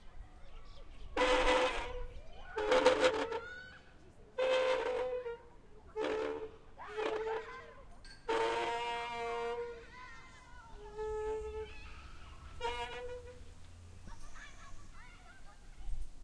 Metal creaking
This was recorded with an H6 Zoom recorder in Zita Park. I used an old sort of merry go round to make this as I thought it could be used for something old opening like a door.